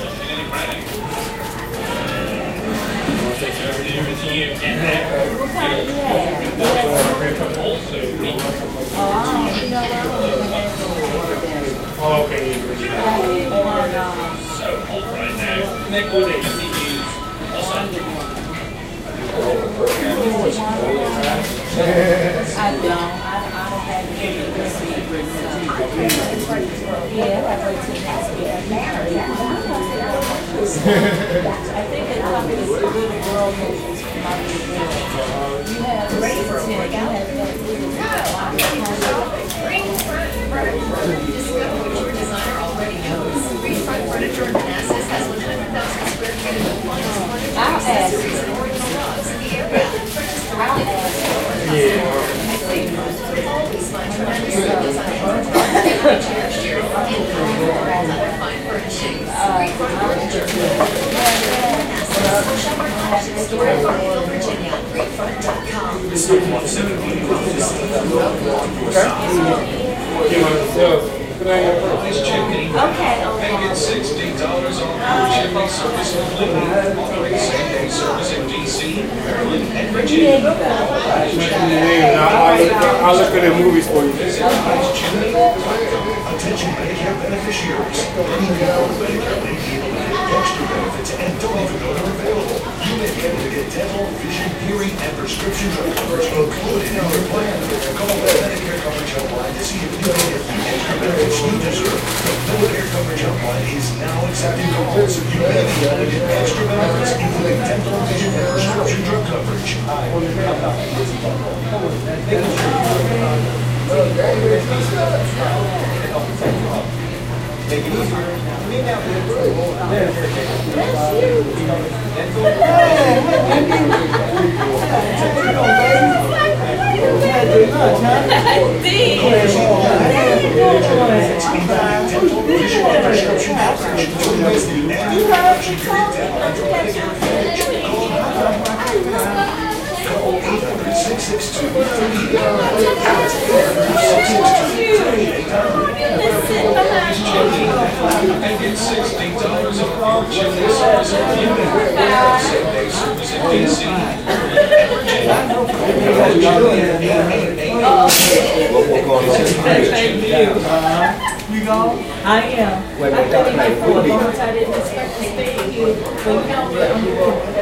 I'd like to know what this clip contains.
Fast Food restaurant2
Inside a American fast-food restaurant from seating area.
atmospheric, people, atmos, ambiance, restaurant, white-noise, background-sound, inside, field-recording, general-noise, background, soundscape, ambient, ambience, urban, fast-food, atmo, walla, atmosphere